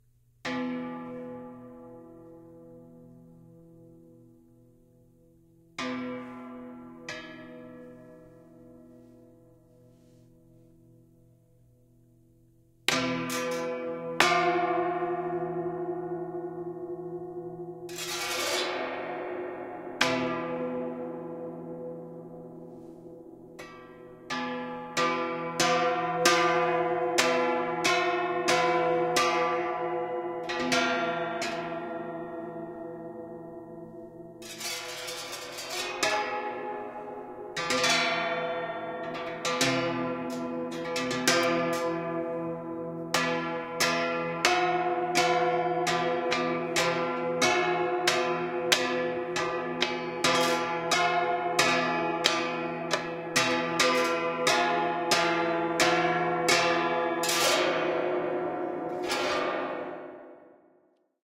Khim (Thai Stringed Instrument)
This is a flat laying stringed instrument from Thailand called a Khim. It is similar to a zither, with many strings and played with leather-tipped bamboo sticks. I don't know how to properly play or tune the instrument, so I am just making some cool sounds with it.